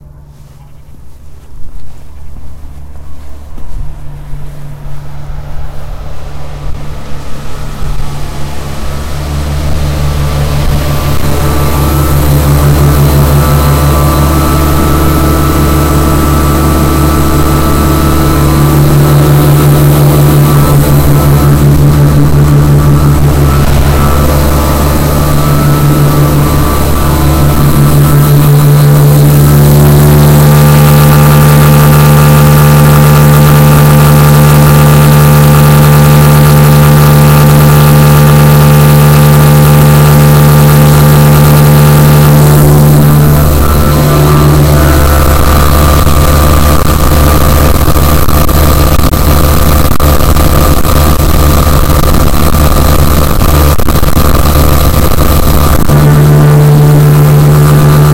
industrial blower recording
A recording of an industrial size motor at work. The recording begins with me walking towards the room that the motor is located. At some point the motor accelerates. Recorded on tascam dr-05
blower, field-recording, industrial, motor